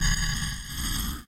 Panning highs from _hev.